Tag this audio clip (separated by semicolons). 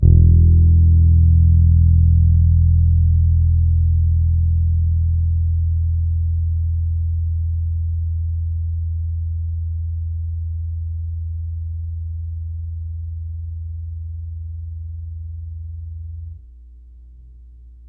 bass; fender; finger; multisample; p-bass; sidekick; string